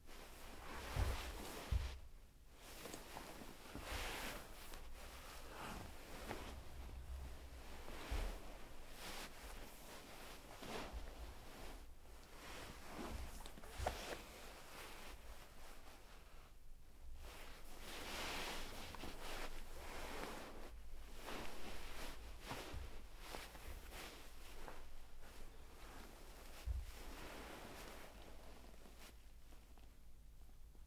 Movement in bed, blanket
Sound of getting up from bed, or moving in bed.
fabric, foley, movement, sheets, bed, rustle, blanket